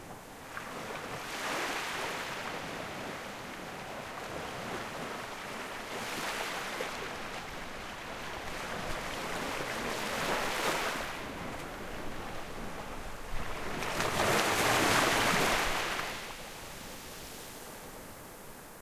Audio captured on the island of Superagui, coast of the state of Paraná, southern region of Brazil, in March 17, 2018 at night, with Zoom H6 recorder.
Small waves. Light wind.
beach, coast, ocean, sea, seaside, surf, waves